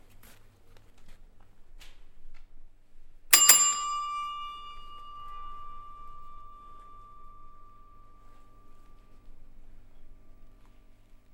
classic Desk Bell recorded with Neumann KMR81
Bell, Desk, Field-recording